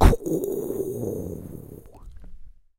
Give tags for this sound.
beatbox
boom
crash
dare-19
human

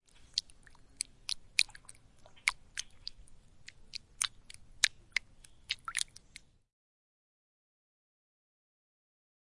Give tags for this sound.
drip
drop
field-recording
lake
liquid
nature
rain
river
splash
stream
trickle
water

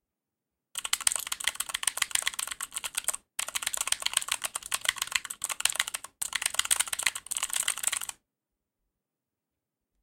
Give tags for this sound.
Staccato
Sound-effect
Mechanical
Keyboard

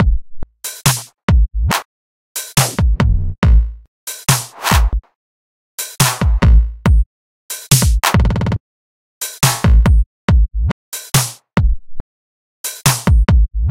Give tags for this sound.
awesome
hit
video
melody
8-bit

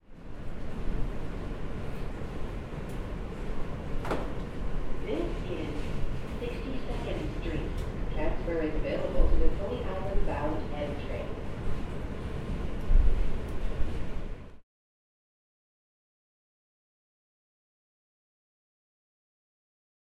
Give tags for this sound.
62nd
moving
nyc
PA
st
subway
train
voice

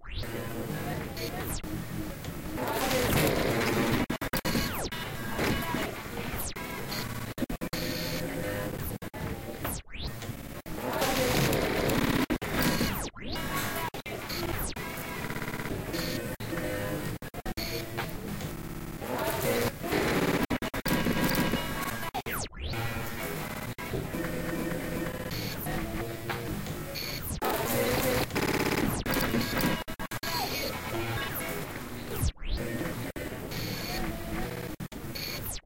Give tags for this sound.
Ambient
Field
Glitch
Illformed
Noise
Tweakbench
VST

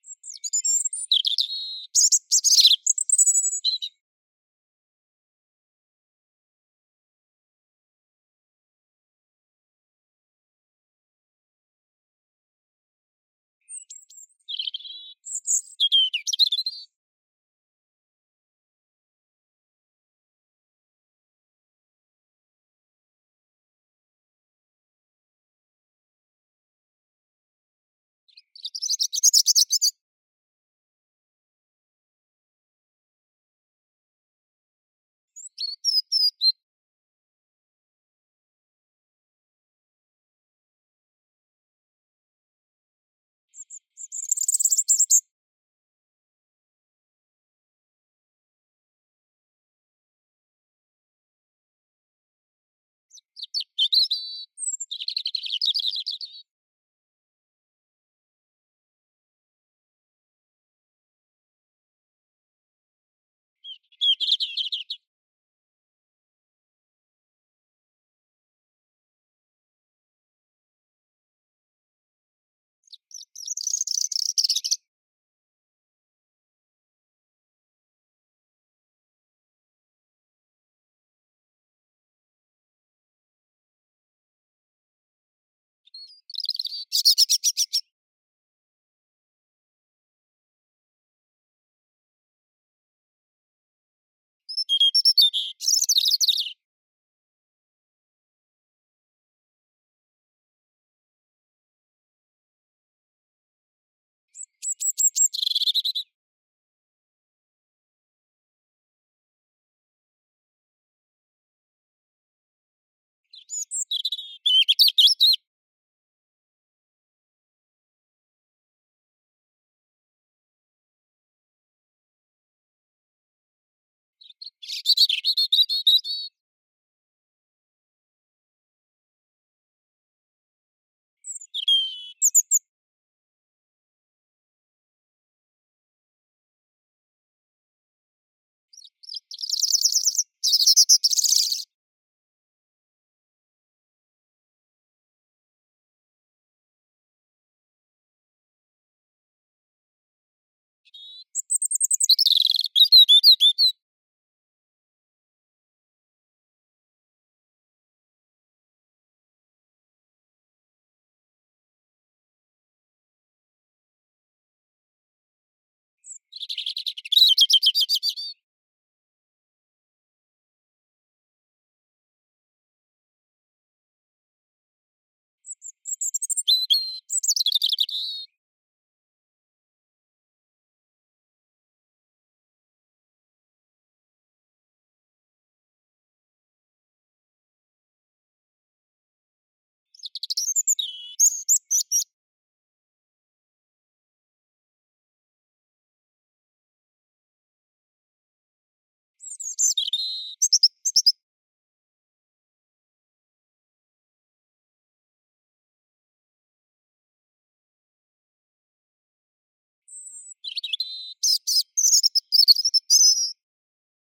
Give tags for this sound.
bird
birds
bird-song
birdsong
field-recording
forest
nature
robin
spring

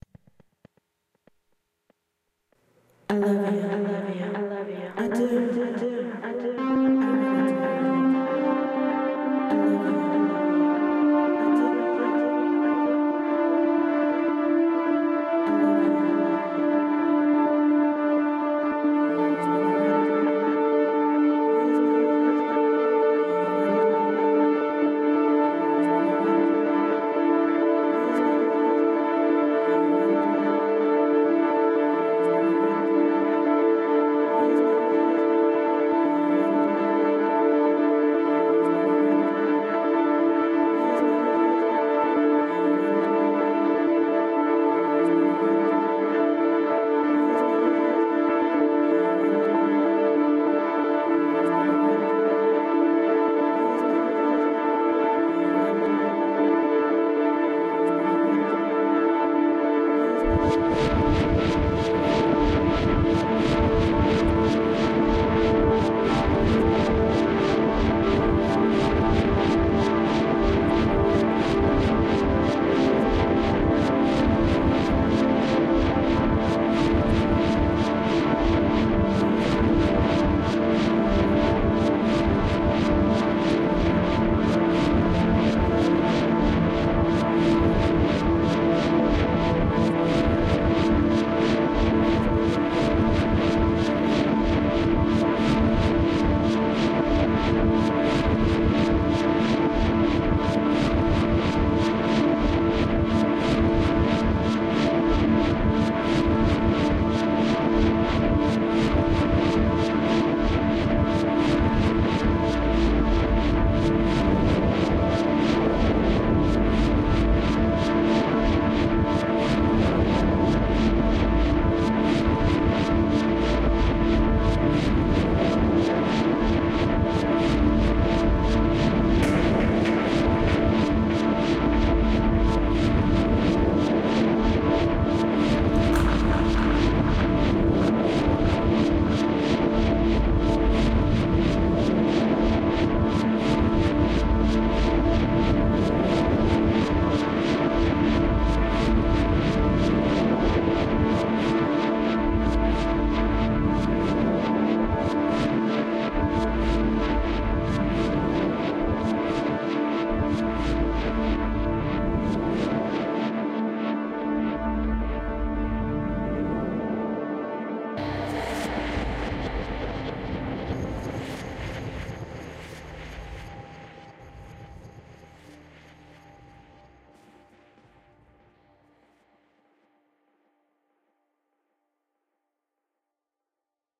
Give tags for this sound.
vocal
woman
talk
text
female
english
voice
reverb
spoken

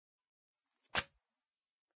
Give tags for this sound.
desk
drop
dropping
paper
reverb
slapping

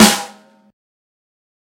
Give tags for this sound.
drum
logic
snare
zero

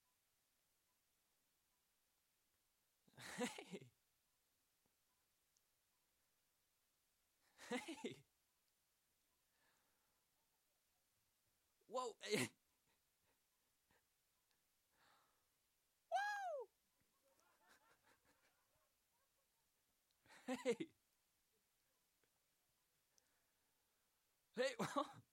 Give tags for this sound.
Woo
Laugh
Chuckle

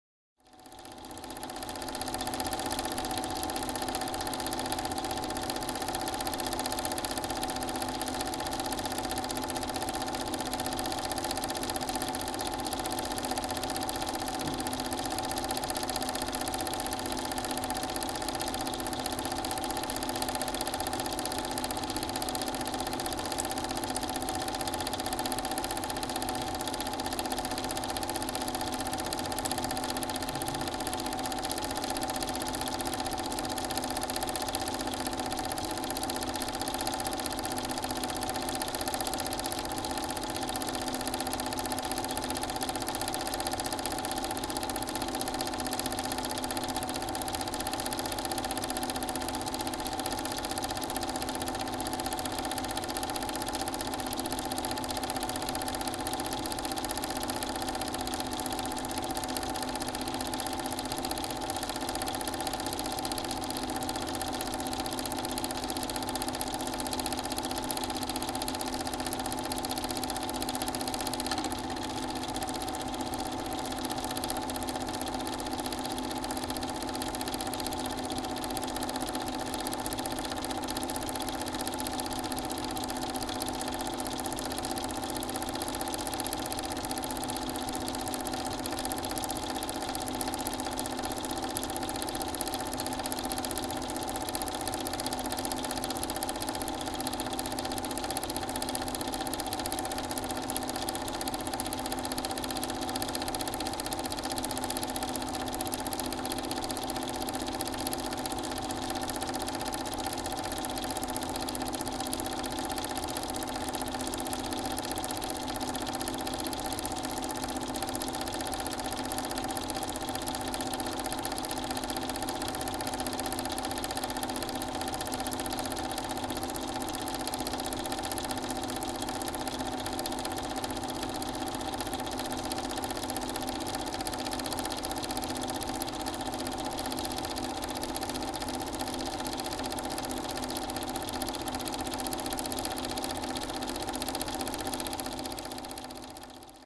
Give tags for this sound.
hobby
movie
projector
8mm
film

